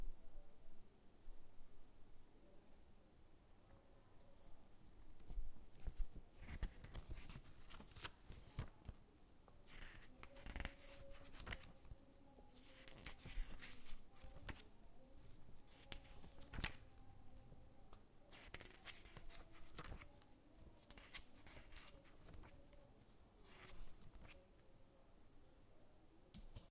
Page turning 1
Turning pages of a book. Recorded in house ambience using Samson Go Mic. Distant chatter can be heard. Need to be cleaned.
Recorded by Joseph
book-flip, page, paper, turning